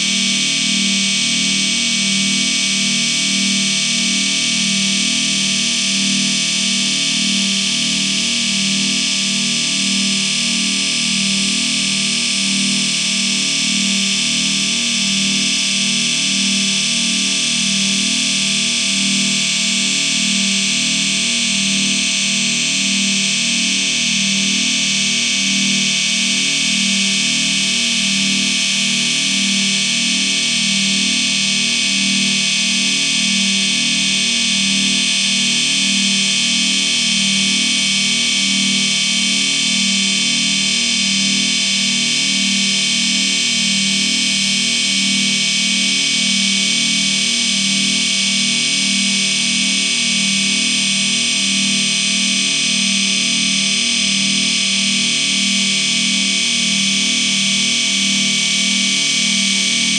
3x256 500k reso 10000hz y freq float 1pointfloat
Sound created from using the rings of Saturn as a spectral source to a series of filters.
The ring spectrogram was divided into three color planes, and the color intensity values were transformed into resonant filter cutoff frequencies. In essence one filter unit (per color plane) has 256 sounds playing simultaneously. The individual filters are placed along the x-axis so, that the stereo image consists of 256 steps from left to right.
In this sound of the series the spectrum was compressed to a range of 20 - 10000 hz. A small variation in certain divider factor per color plane is introduced for a slight chorus like effect.
chorus-effect, fft, filter, resonance